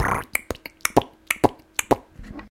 my hiphop beat
beat box loop
beat, beatbox, box, dare-19, hit, human, perc, percussion